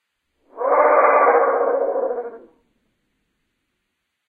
Mutant Bird 1
A small dinosaur or dragon or whatever maybe?
It used to be a goose.
dinosaur, animal, creature, vocalisation, mythical, extinct, baby-dragon, vocalization, small-dragon, mutant, baby-dinosaur, growl, small-dinosaur, dragon